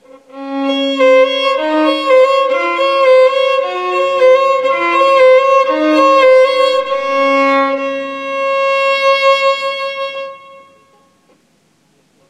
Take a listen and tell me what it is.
Baroque Phrases on Violin. Improvising some kind of D Major Scale going Up and/or Down while adding some Ornamentation.